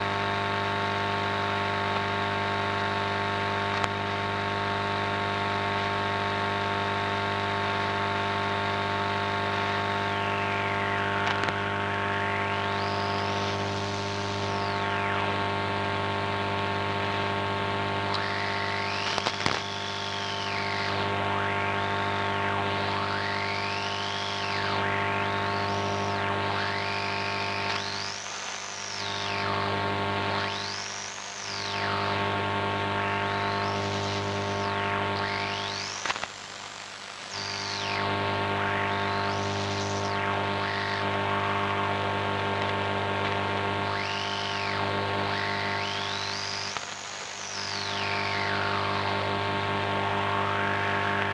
Longwave Radio Tuning
A dual mono recording of a longwave tuner.
mono, noise, static, tuning, off-station, tuner, radio